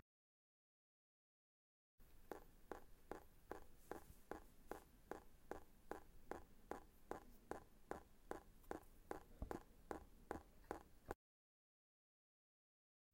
water drip of water tap